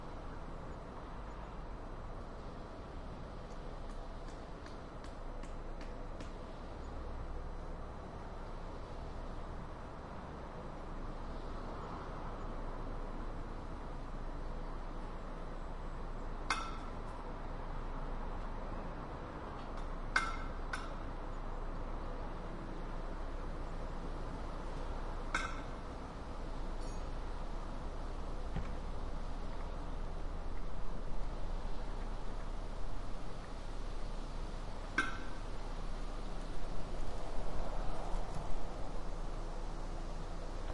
Street ambience near moscow parking
Recorded via Tascam DR-100mkII